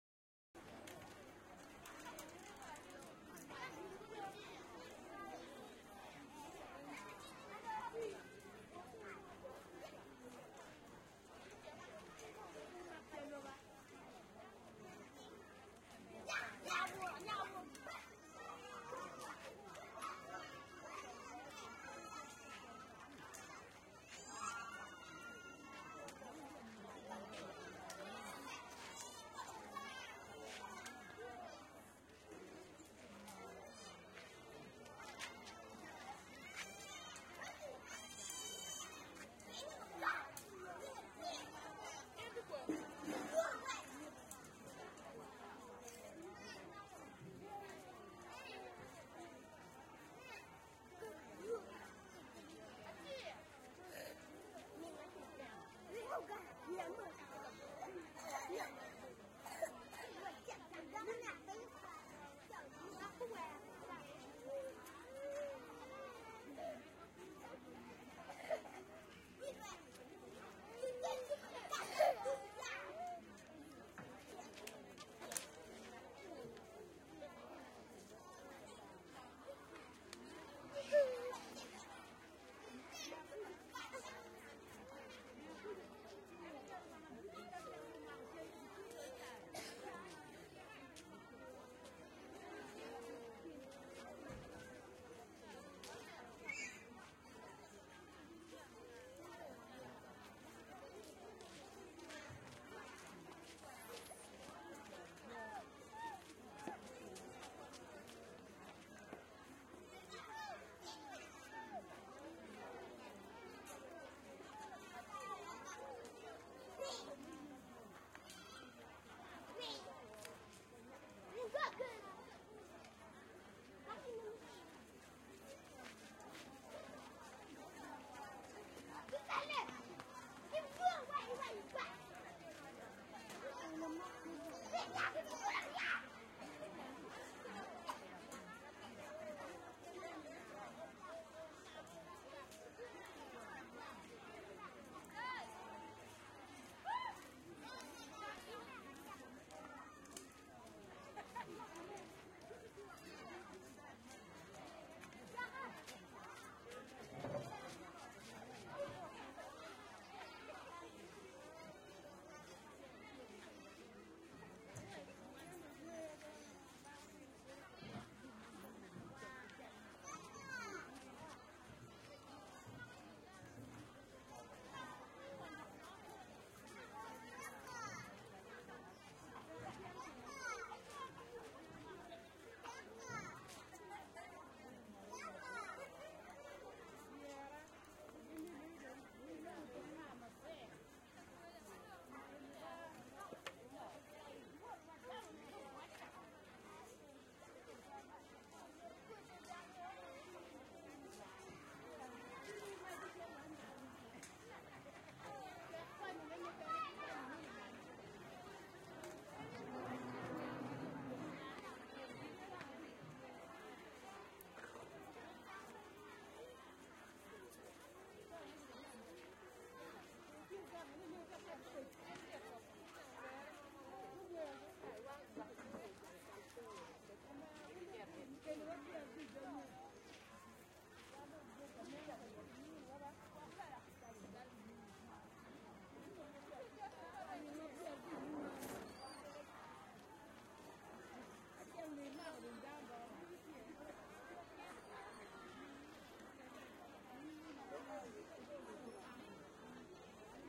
KC0855QX
atmo, refugee, camp, uganda